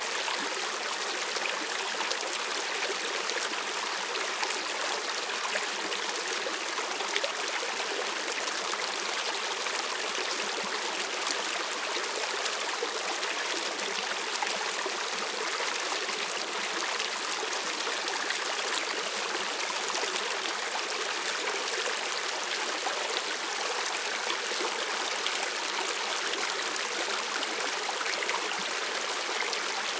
Creek in Glacier Park, Montana, USA